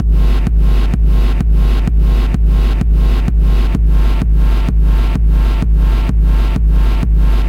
Propellerheads Reason
rv7000
3 or 4 channels, one default kick, others with reverb or other fx.